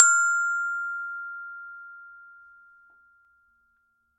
Samples of the small Glockenspiel I started out on as a child.
Have fun!
Recorded with a Zoom H5 and a Rode NT2000.
Edited in Audacity and ocenaudio.
It's always nice to hear what projects you use these sounds for.
recording, multisample, metal, single-note, sample, note, one-shot, metallophone, campanelli, multi-sample, percussion, Glockenspiel, sample-pack